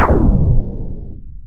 short-glitch
Short glitch from a music render.